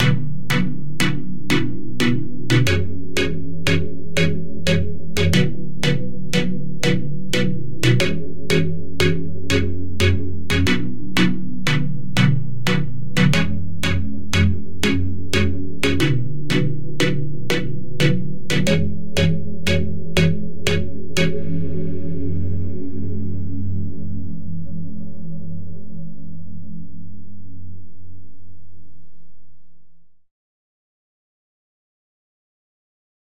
Deep House #1
Sine Chords in Serum